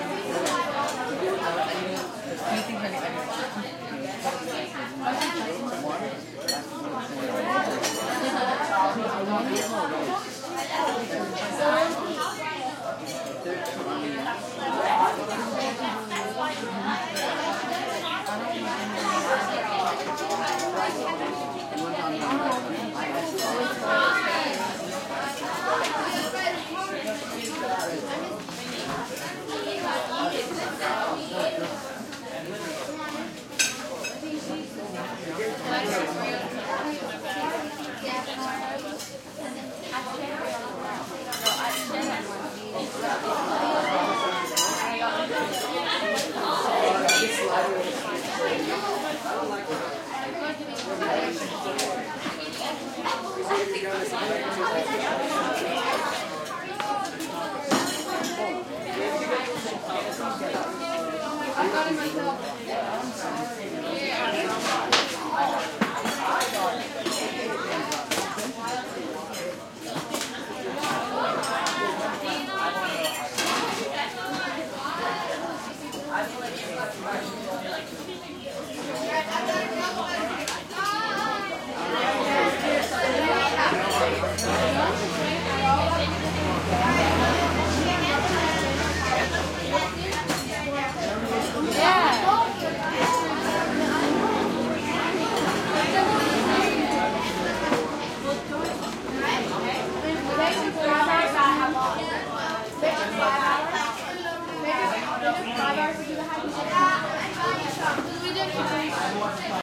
Thailand crowd int medium active young people Belgian tourists eating at roadside restaurant heavy walla, cutlery, and steps movement closer perspective +nearby passing traffic near end
field-recording, roadside, walla, restaurant